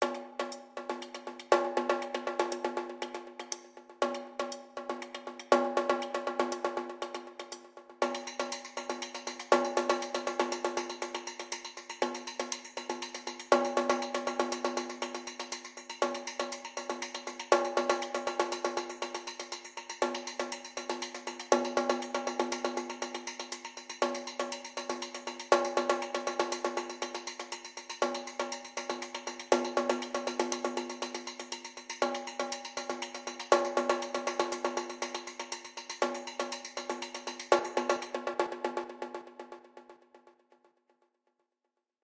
Loop - Night Run
Simple Percussion Loop